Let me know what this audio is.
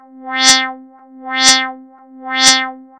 I've generated a pluck effect and applied the wahwah effect, then I reversed the sound and placed it just after the original one, finely the resulting sound is repeat twice.